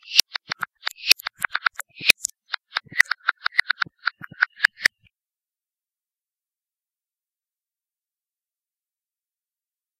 Beatboxer squirrel
audacity, beatboxer, by, made, squirrel